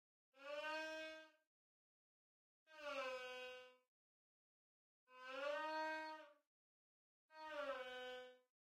Libra, swing sound effect
A sound of swinging, best for animated scenes.
Made with a violin and a Zoom H4n.